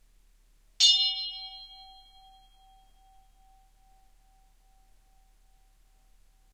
This version is 30% slower than the original. Edited in Audacity 1.3.5 beta
bell, bing, brass, ding